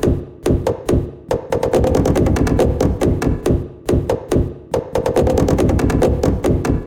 Slip-Stick Cutting Loop 140 BPM
This loop is made from sounds produced entirely from cutting paper. I loaded the sample into the FL Studio 'Fruity Slicer' and knocked out a quick 2-bar 140 bpm loop.
140-bpm, fl-studio, loop, paper, percussion